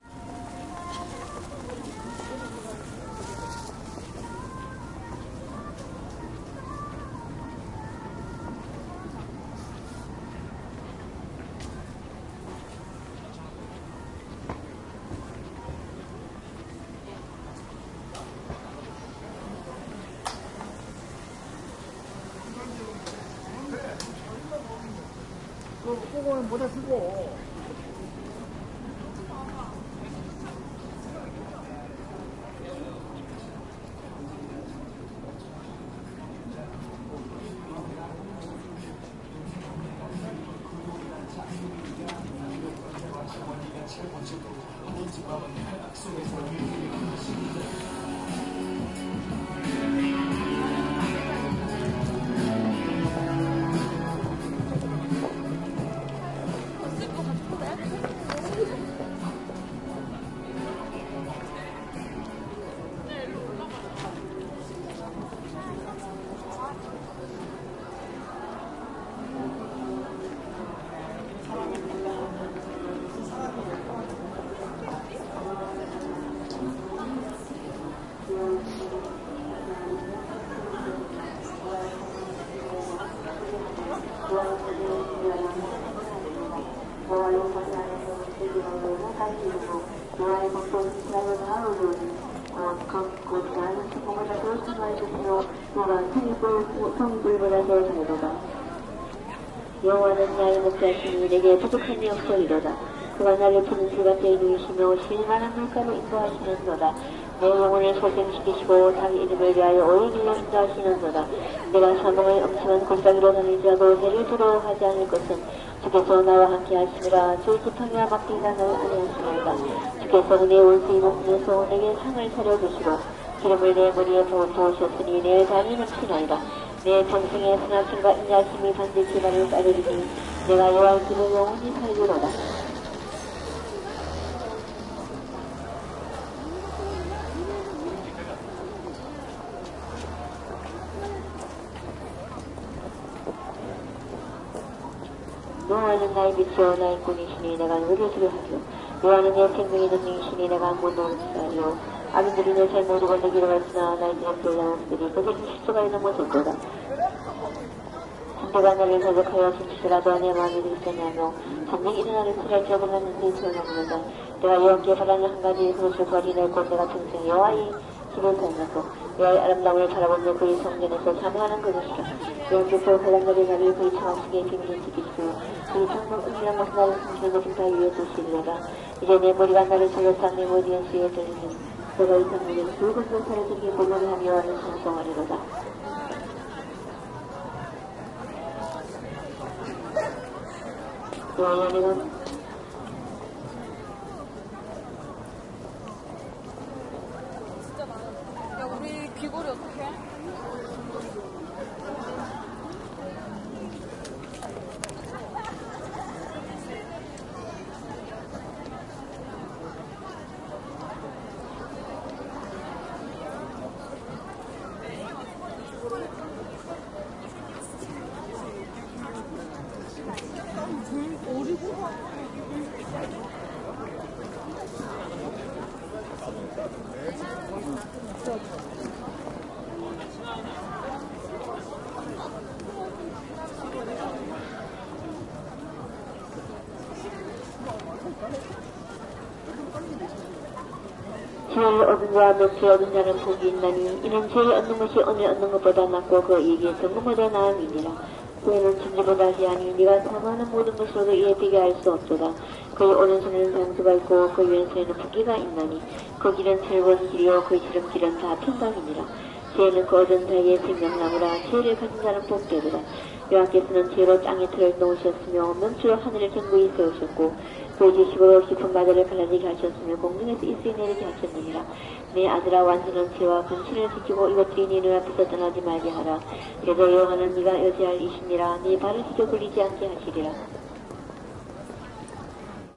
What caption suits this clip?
0157 Praying shopping street
Shopping street, people talking and walking, music. Woman praying with a speaker in Korean.
20120212
field-recording, korea, korean, music, seoul, steps, voice